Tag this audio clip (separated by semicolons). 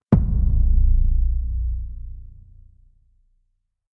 hits; hit; impact